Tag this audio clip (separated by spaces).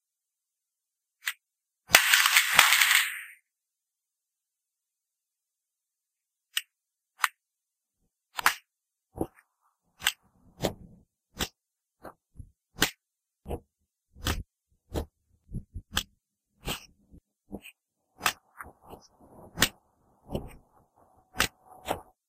climb crutch stairs step walk walking